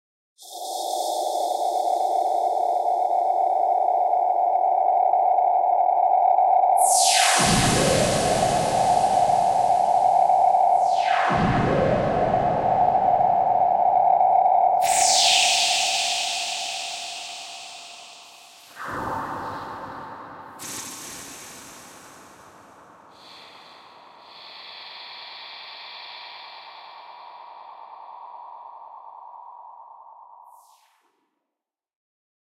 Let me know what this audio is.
Some kind of long space wooshes \ drone style.